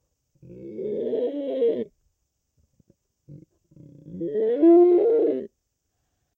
Some monster or ape voice.